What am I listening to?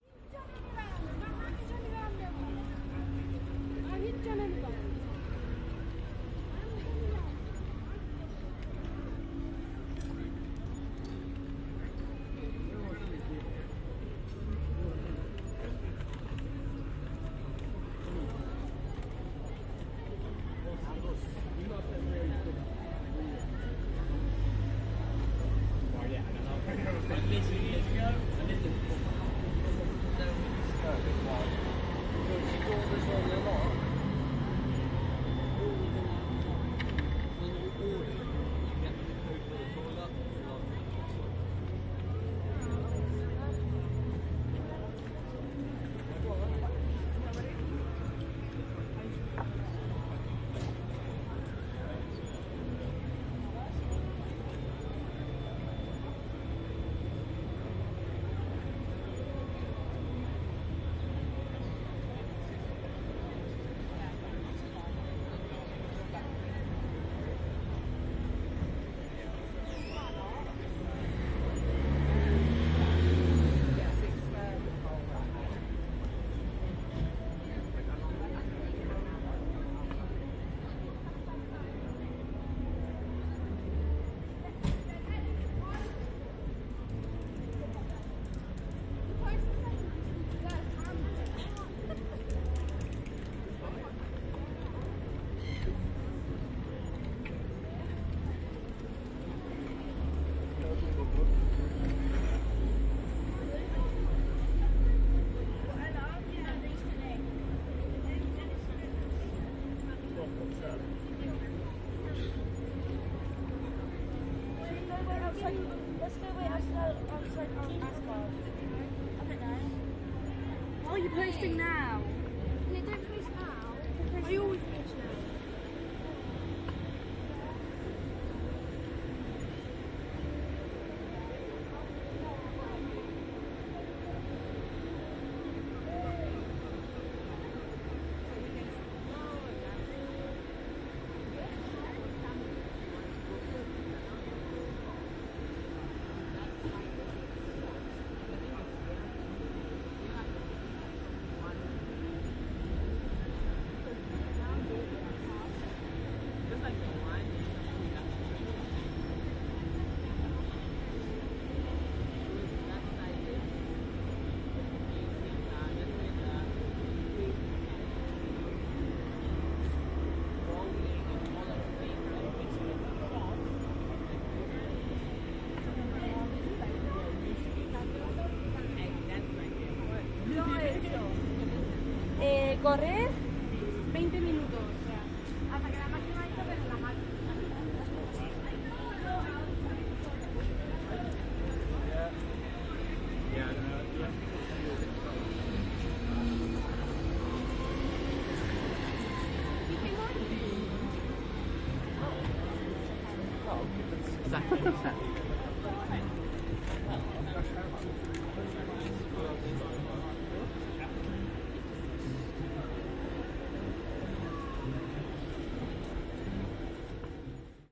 Outside KFC
Stood outside of the KFC in Southampton with a Zoom H1 and Roland in-ear mics.
food, ambience, street, kfc, uk, talking, binaural, centre, fried, chicken, kentucky, engines, city, town, outside, england, people, southampton, downtown, fast, noise